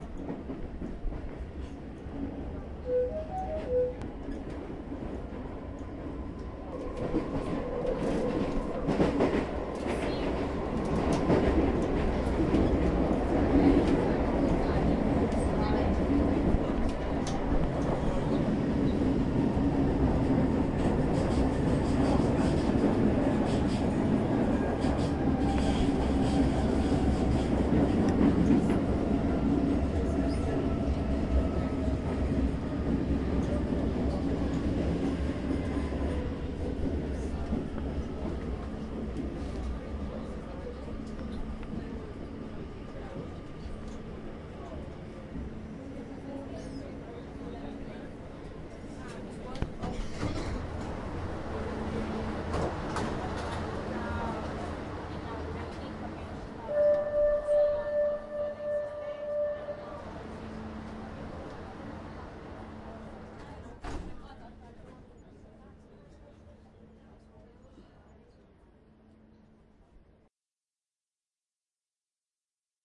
underground TMB
Backgrpund noise of the BCN underground.